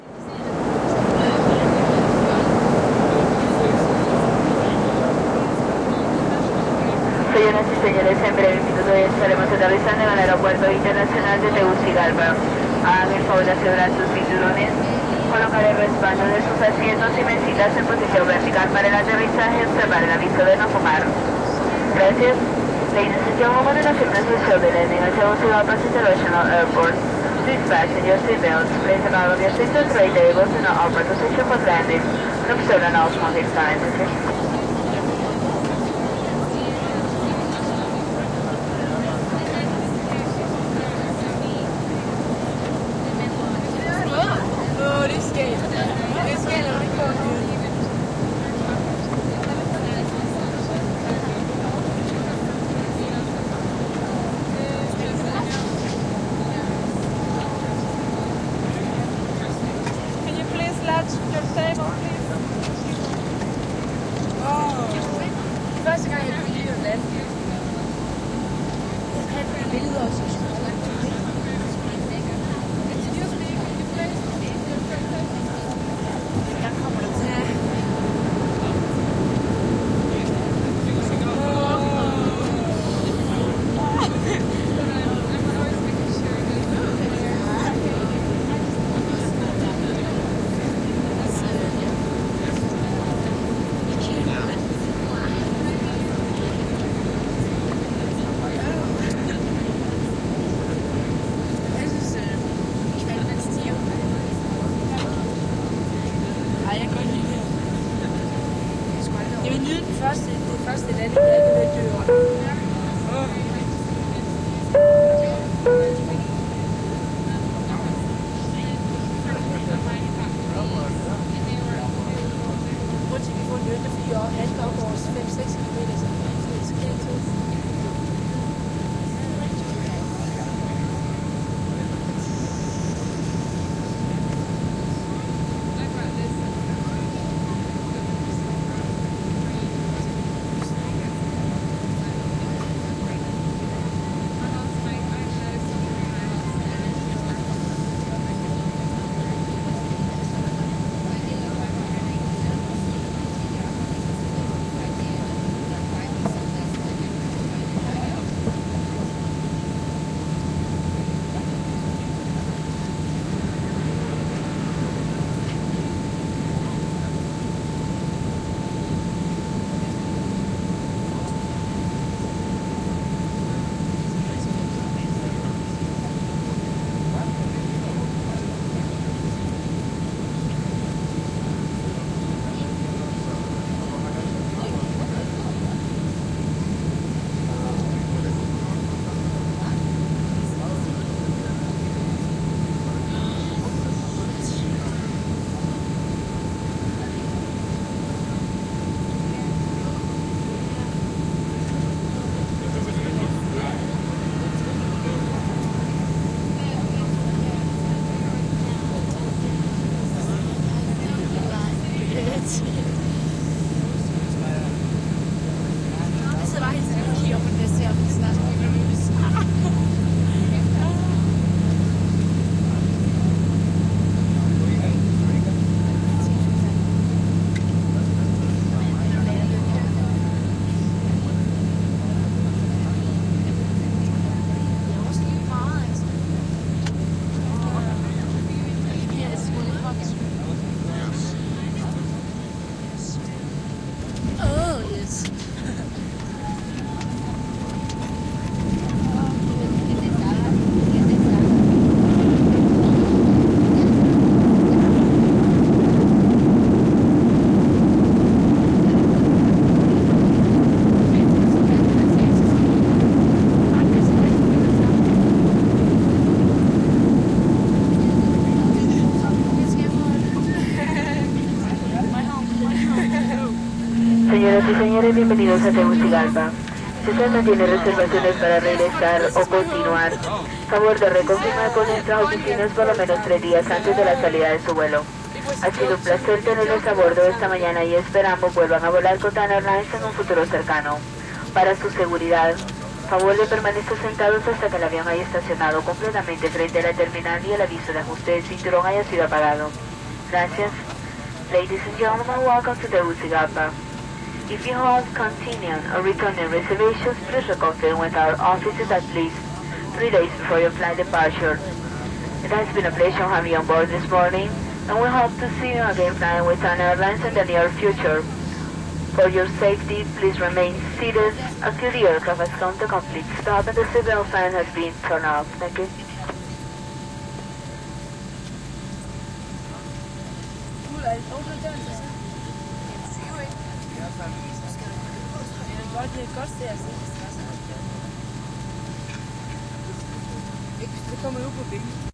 Passenger plane landing to Tegucigalpa // Matkustajakone laskeutuu Tegucigalpaan
Matkustajakone Tegucigalpaan (Honduras). Lentoa matkustamossa, vaimeaa puheensorinaa. Kuulutus espanjaksi ja englaniksi. 1'50" äänimerkki, ping-pong. N. 4' laskeutuminen ja jarrutus. Kuulutus espanjaksi ja englanniksi.
Place/paikka: Tegucigalpa Airport
Date/aika: 1987